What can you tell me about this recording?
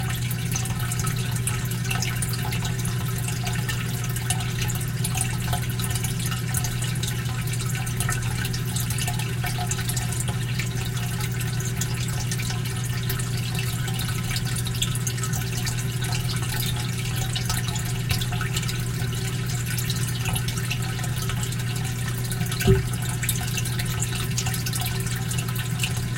I let the fishtank get too low (only 1 fish left anyway) so the waterfall filter is LOUD.
fish, water